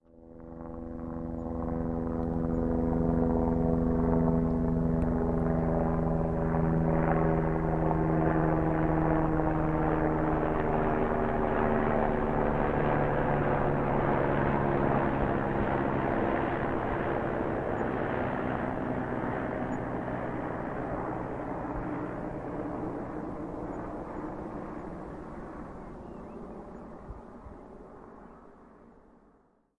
Helicopter flyby
A helicopter flying by (right to left channel). Recorded with Zoom H1
flyby, fly, aircraft, chopper, flying, helicopter